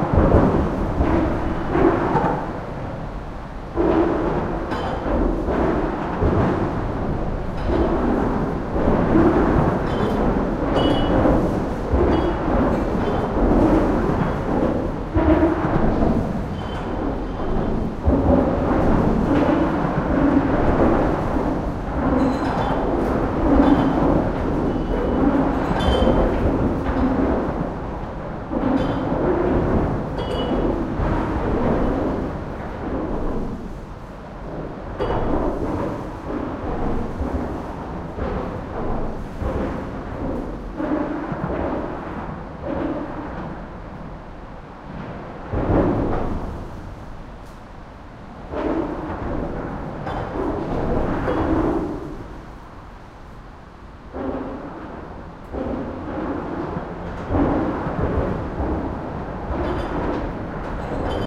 GGB A0216 under north approach
Ambient recording of the Golden Gate Bridge in San Francisco, CA, USA from underneath the northern (Marin) approach: car music. Recorded October 18, 2009 using a Sony PCM-D50 recorder's internal mics and windscreen.